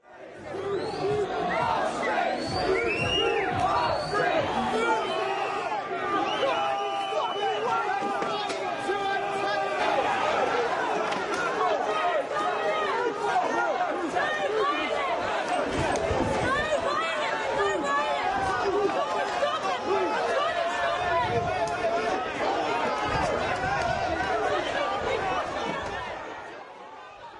Bristol Riots Shouting and Swearing
Real audio of the Bristol riots from summer of 2011. PLEASE NOTE THAT THERE ARE ABUSIVE WORDS INCLUDED. This was recorded as part of my coverage of the riots, which I later allowed the BBC to use on their News programme.
DISCLAIMER: I was not involved in the rioting and was only present as a bystander in order to record the events.
streets, swearing, whose, wildtrack